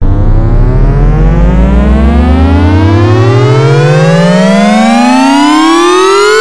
Squarewave Build-up
Multiple alternating square waves scaling up in pitch, designed in
Milkytracker.
This sound, as well as everything else I have upload here,
is completely free for anyone to use.
You may use this in ANY project, whether it be
commercial, or not.
although that would be appreciated.
You may use any of my sounds however you please.
I hope they are useful.
beam, chorus, edit, effect, efx, free, fx, multi, scale, sfx, sound, sound-design, sound-wave, square, square-wave